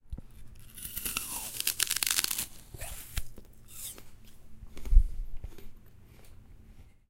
Biting Apple
Field-Recording, Elaine, University, Point, Park, Koontz